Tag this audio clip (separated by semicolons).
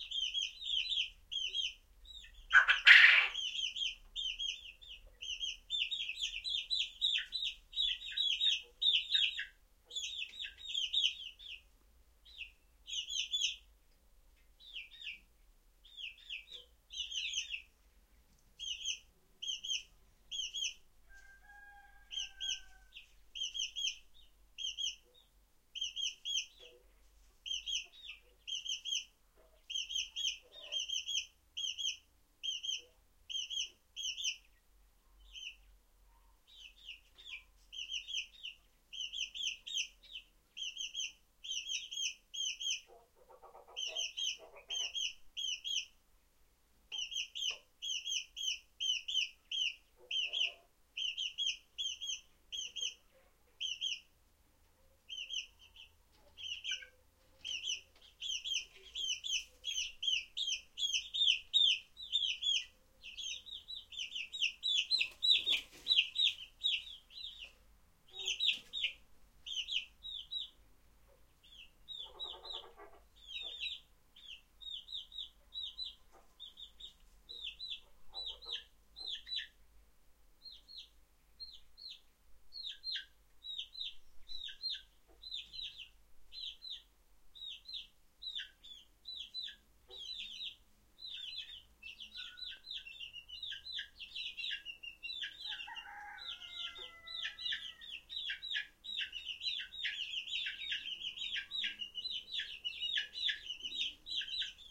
birdsong; chicken; farm; field-recording; outdoor; poultry; quail